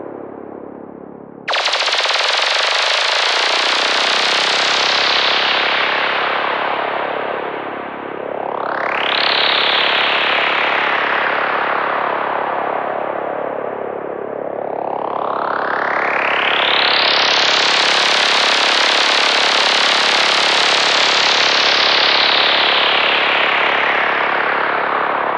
space psychedelic
SPACE, CRAZY, PSYCHEDELIC